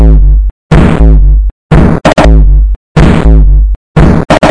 a terrible offbeat loop
industrial, loop, weird